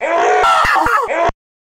a processed scream from fruity loops.
processed, break, glitchy, scream, vocal, panda